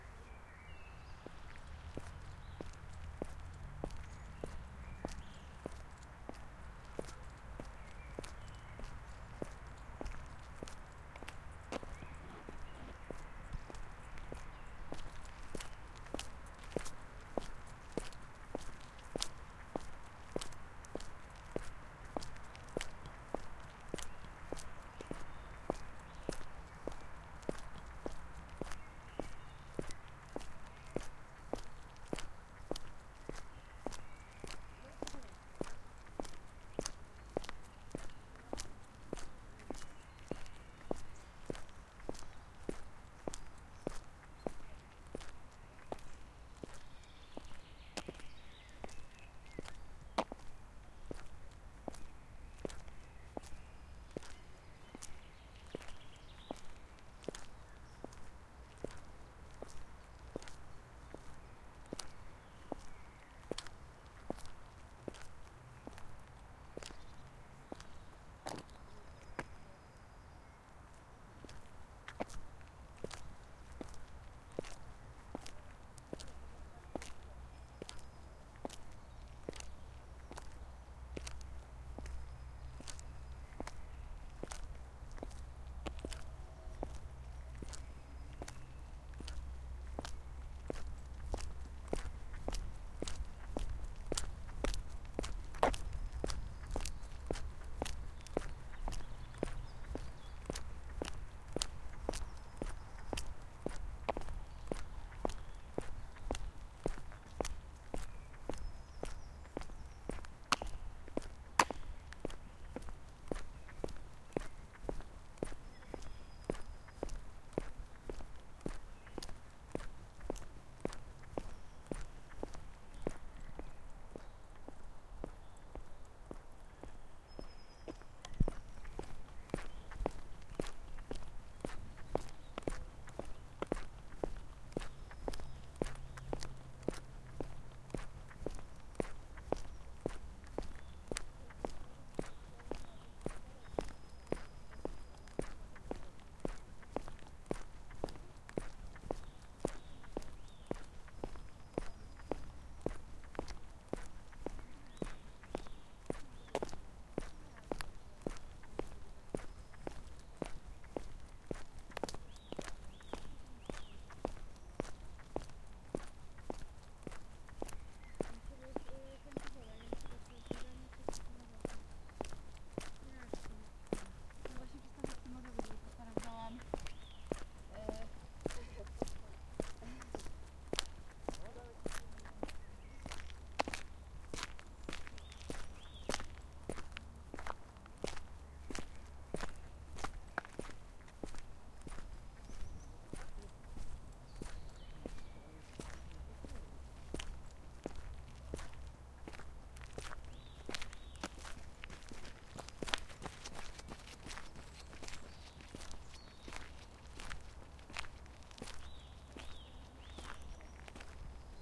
kroki-meskie-asfalt-park-lesny
[pl] Wczesna wiosna, kroki w męskim obuwiu na ulicy asfaltowej w lesie. Na końcu przejście na drogę gruntową
V4V
[eng] Early spring, steps in men's shoes on an asphalt street in the forest. At the end, a transition to a dirt road
V4V rulez
footsteps, ground, kroki, steps, walk, walking, walks